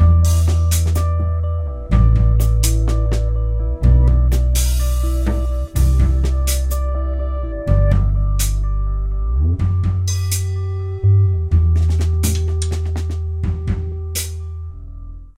A loop culled from an unfinished song, recorded in early 2011.